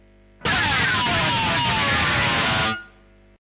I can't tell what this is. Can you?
I rolled the pick through the strings of a distorted electric guitar.